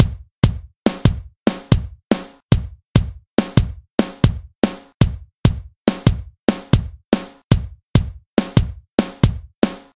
A drum pattern in 5/5 time. Decided to make an entire pack up. Any more patterns I do after these will go into a separate drum patterns pack.
pattern, full, 4, 5-4, kit, 5, drum